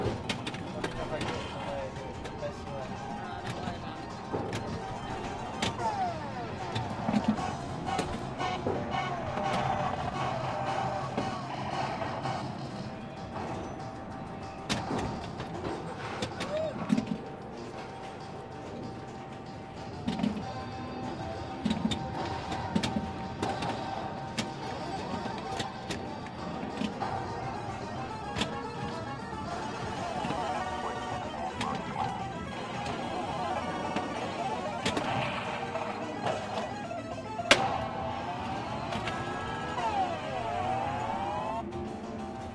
Pinball Hall Of Fame 2
Sounds from the Pinball Hall Of Fame in LAs Vegas.
arkade, game, pinball